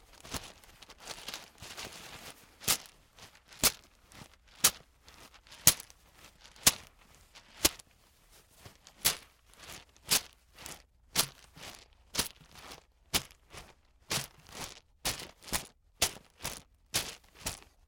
These are various sounds of a large bag of Scrabble pieces.
wood, shaking, bones